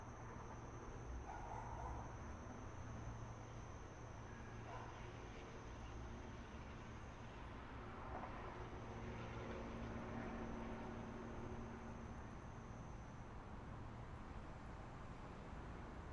AMBIENTES DE FOLEY noche oscura espacios abiertos
Noche, Buzz, Deber, ESpacio, UIO